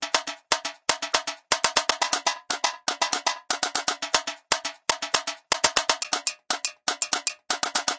TinCanBeat 120bpm01 LoopCache AbstractPercussion
Abstract Percussion Loop made from field recorded found sounds